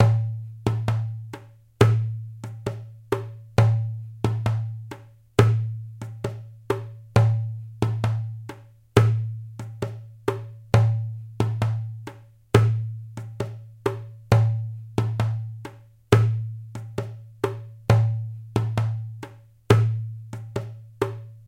drum rythm1
drums, drum, loop, beat, Asian